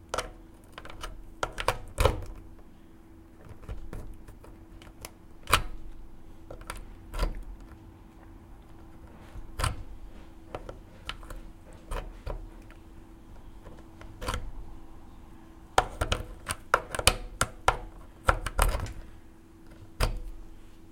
USB port plugging: finding the right position for the usb port
plugging, acoustic, usb, sound